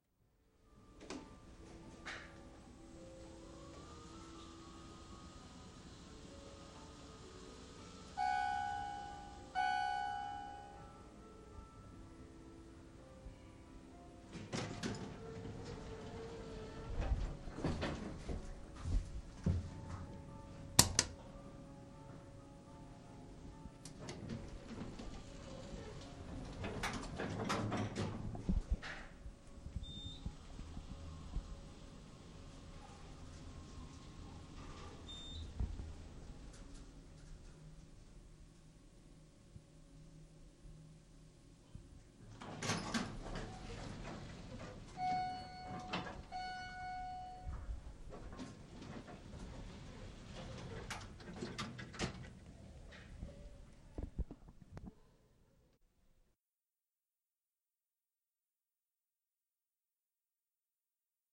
The sound of taking an elevator up one floor.
bell, close, door, elevator, open